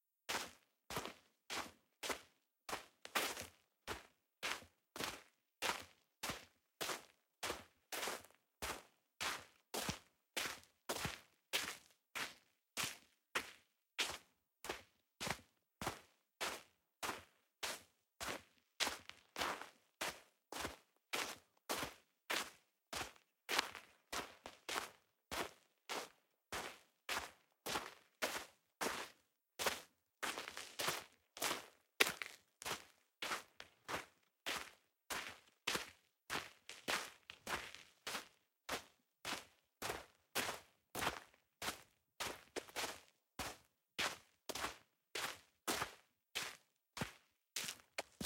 footsteps-wet-sand
field-recording, wet, sand, footsteps